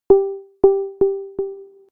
Low-signal
A notification sound signifying the user is out of range